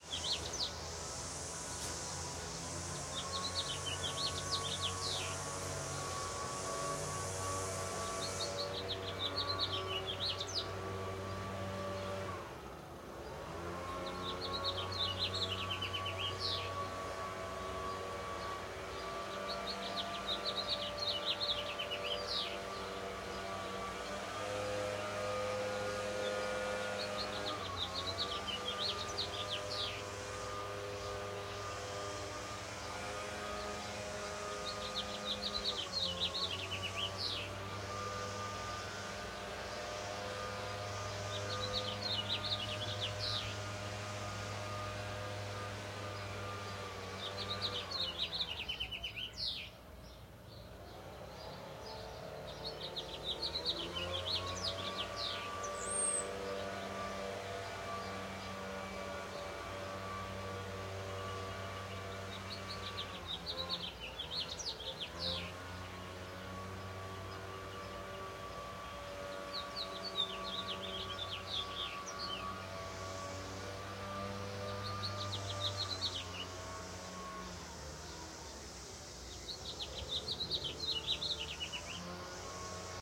City Sounds - Leafblower & Birds
Recorded in my backyard with an SM57.
ambiance, ambience, ambient, backyard, birds, city, gardener, leaf-blower, leafblower